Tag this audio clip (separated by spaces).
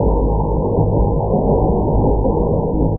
process synth drum